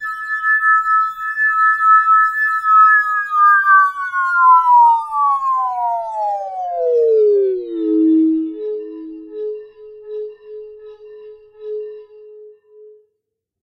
Dive Bomb
Bomb, Whistle, crystal